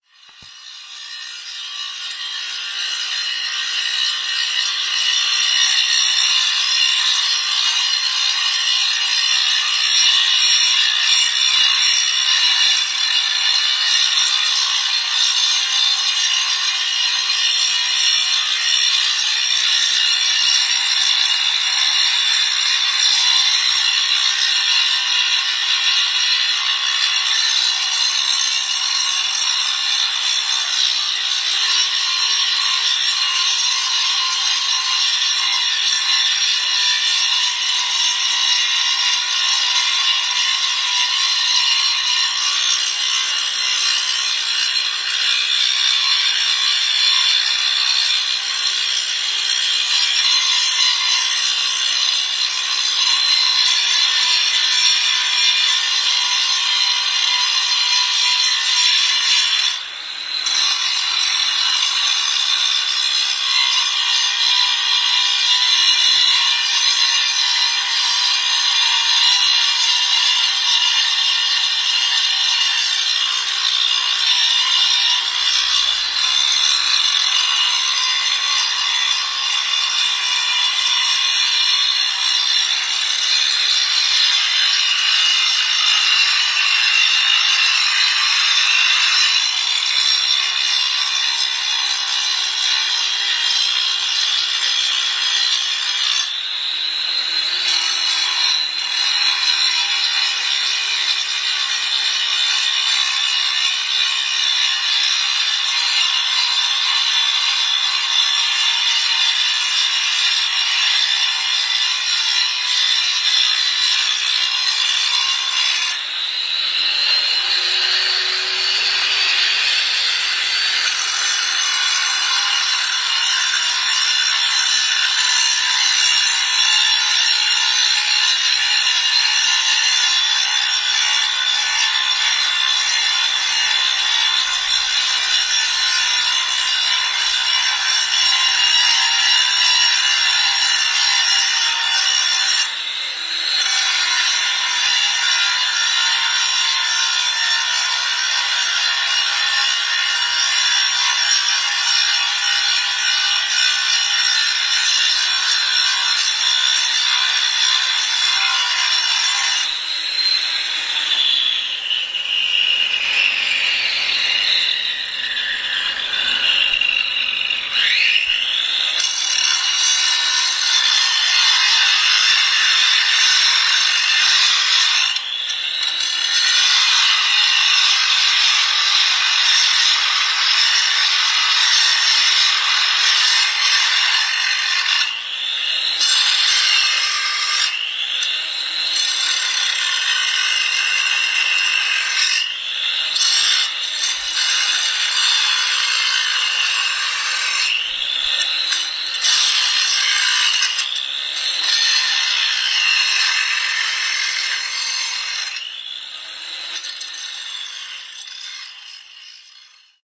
30.07.2010: between 1.00 and 2.00 at night. Gorna Wilda street. two workers are repairing the tram line. here the noise of polishing.